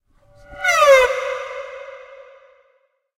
An effected violin.